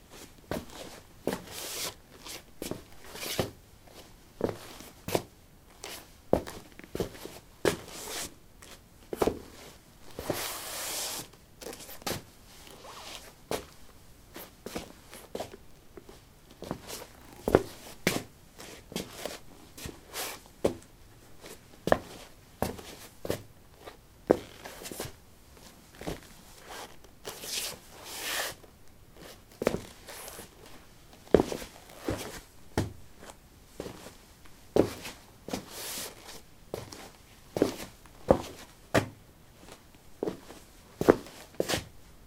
lino 15b darkshoes shuffle threshold
Shuffling on linoleum: dark shoes. Recorded with a ZOOM H2 in a basement of a house, normalized with Audacity.
footsteps, step, steps